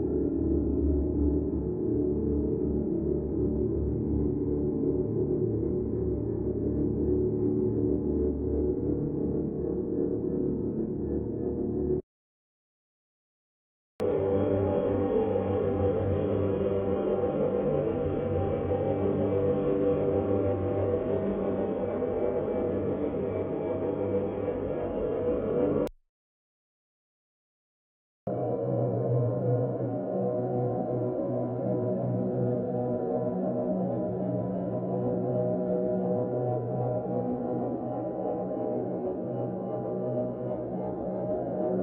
Terror Texture N°1 'Funfair'
A terrorific and/or abundant wall of sound pad texture.
Situation: for some reason, it reminds me to a funfair. To listen a distant funfair meters underground.
ambient, funfair, background-sound, phantom, texture, pads, horror, industrial, ak, textures, terror-ambient, sinister, atmosphere, atmospheres, thrill, scary, creepy, terrifying, ambience, terror, anxious, pad, spooky, weird